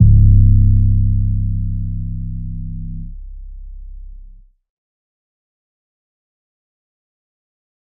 Some self-made 808s using various synthesizers.